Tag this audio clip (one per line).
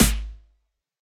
kit
hits
drum
noise
sounds
samples
idm
techno
experimental